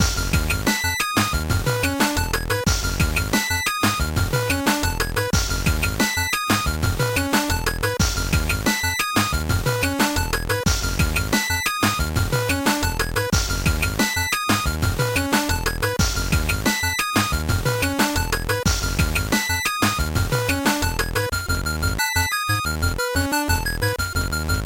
8bit drum
Used ableton to pay homage to the gameboy and made some 8 bit songs.
fun, game-music, decimated, retro, cartoon, mix, chiptune, chiptunes, 8-bit, chip, video-game, 8bit, vgm, arcade